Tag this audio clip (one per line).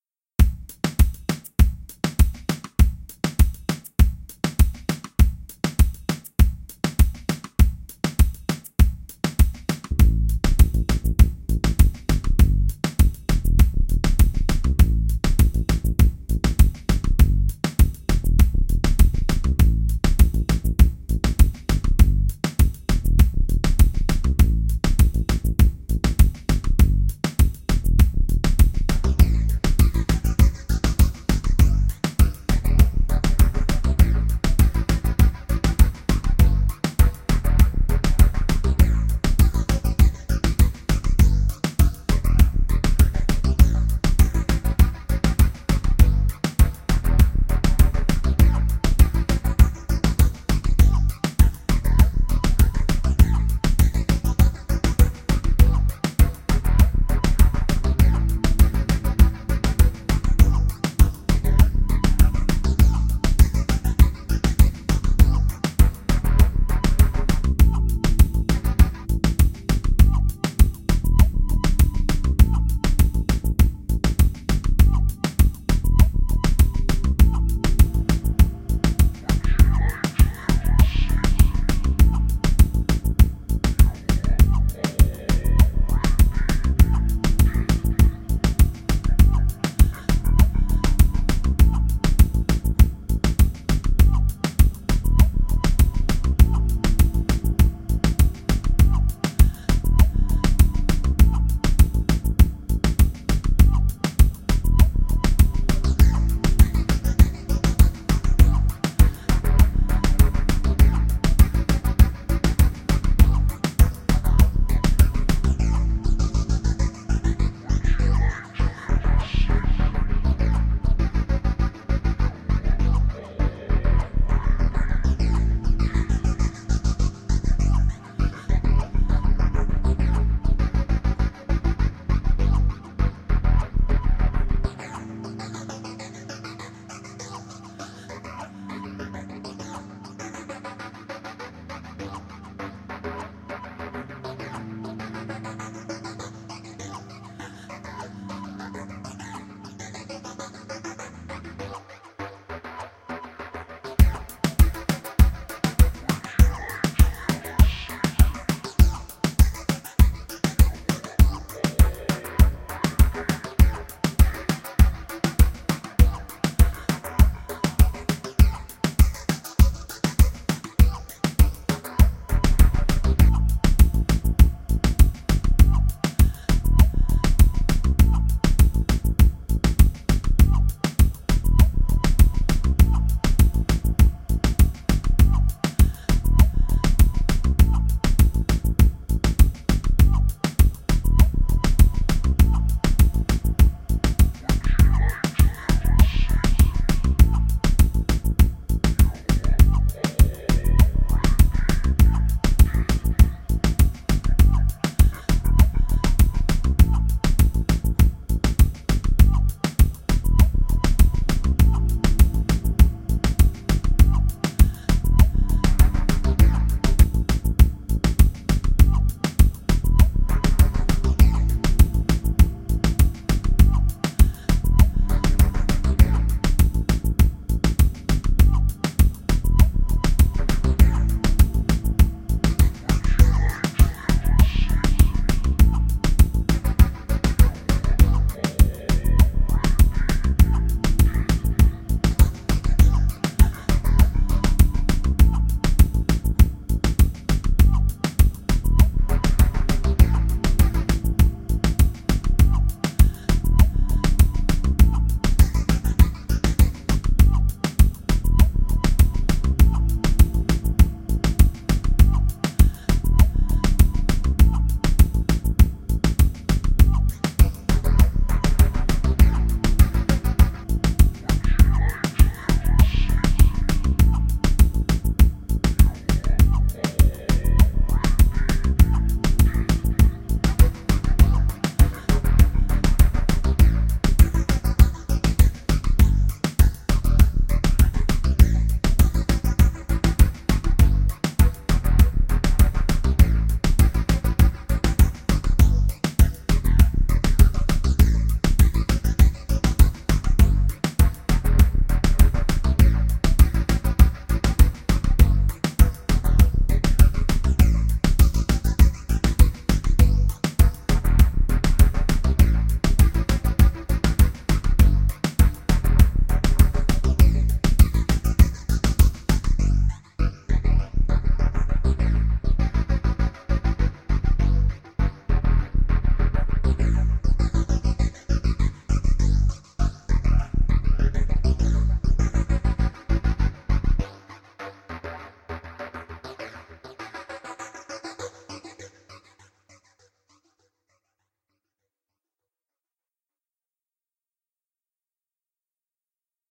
beats
reggaeton
song
beat
music